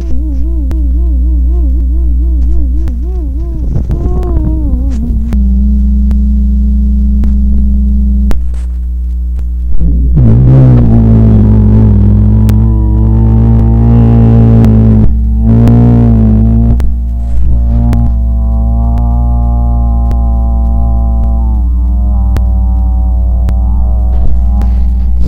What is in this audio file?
Suspense, Orchestral, Thriller